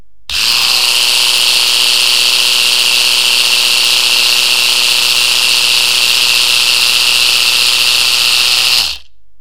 ten seconds of a working epilator, no background noises
female, machine, epilator, engine, electricity